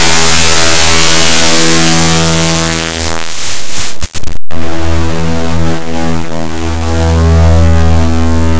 menacingmachine1version2
Check your volume! Some of the sounds in this pack are loud and uncomfortable.
A very different analysis. Actually a much less menacing and cleaner sounding machine having a little moment of deja vu!
static, sci-fi